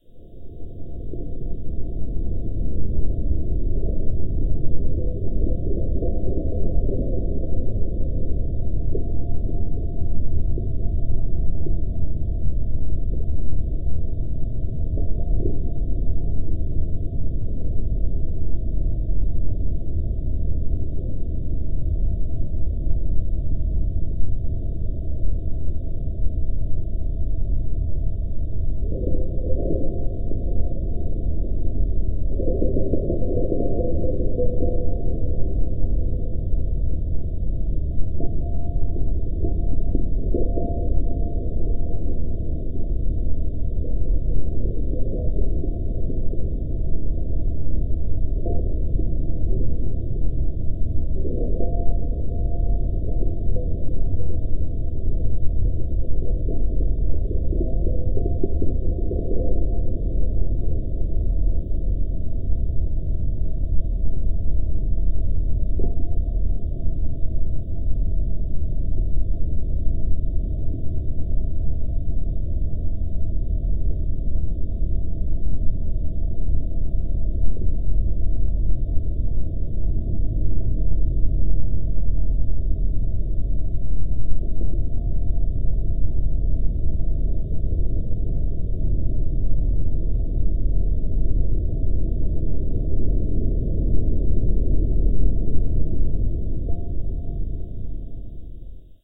For best experience, make sure you:
* Don't look at the sound waves (the sound display) at all!
* Is in a pitch-black, closed room.
* For ultra feeling, turn up the volume to 100% and set the bass to maximum if you have good speakers! Otherwise put on headphones with volume 100% (which should be high but normal gaming volume).
* Immerse yourself.
Space Atmosphere 04 Remastered
This sound can for example be used in action role-playing open world games, for example if the player is wandering in a wasteland at night - you name it!
If you enjoyed the sound, please STAR, COMMENT, SPREAD THE WORD!🗣 It really helps!
More content Otw!